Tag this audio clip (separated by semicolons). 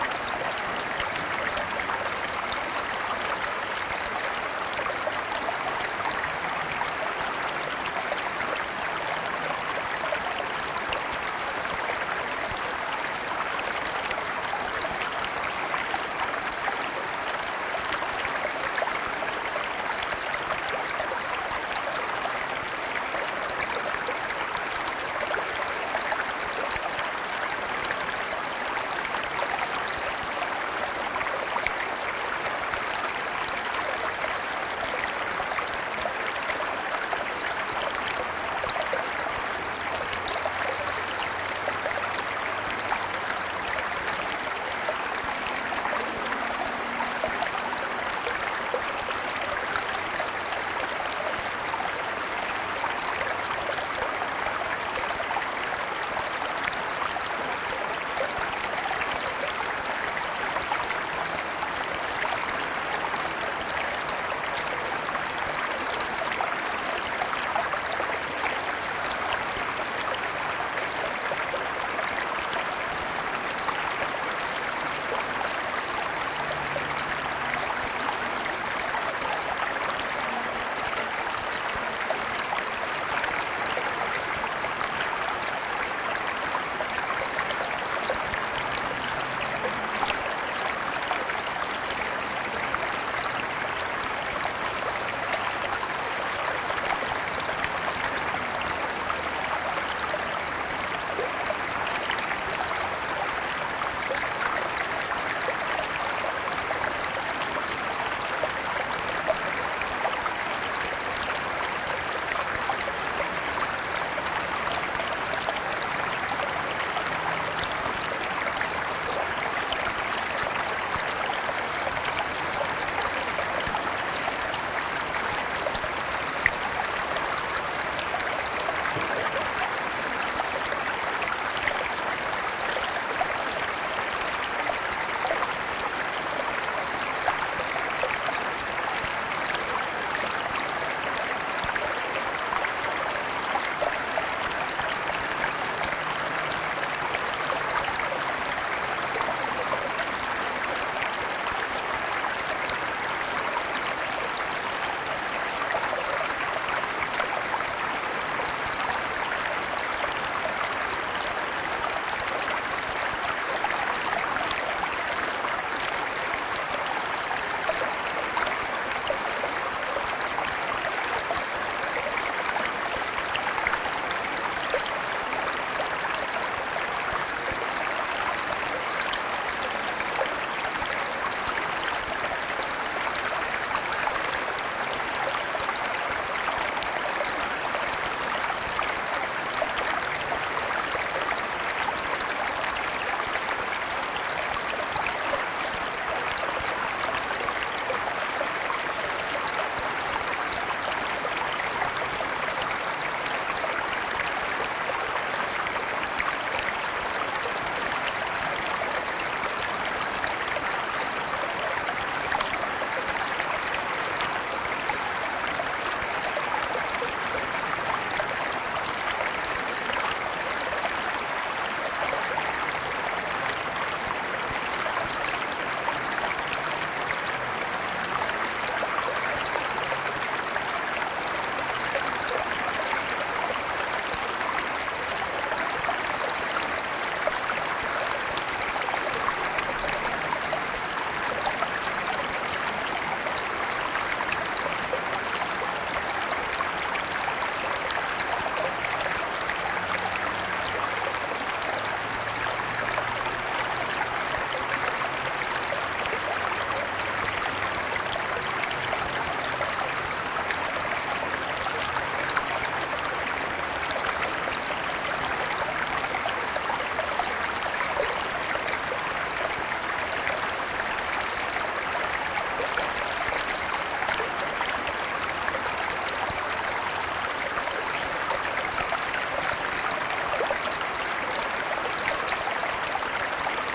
river; shallow; stream; traffick